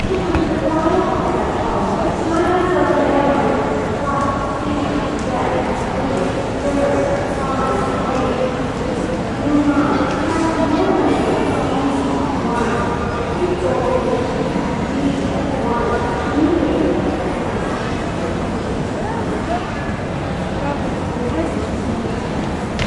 BKK airport with massive reverb anouncement
Recorded in Suvarnabhumi airport. Massive echo/reverb due to lots of glass and marble architecture which - in small doses - creates a very large and busy feeling. One announcement in Thai.